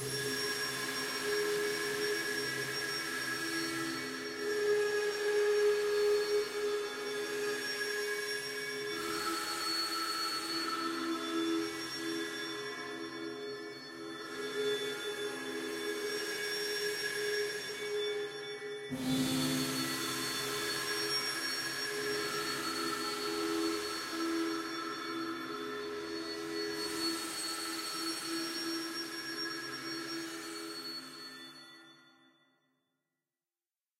Distorted Piano created in Ableton.